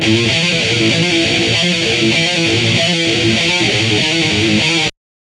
rythum guitar loops heave groove loops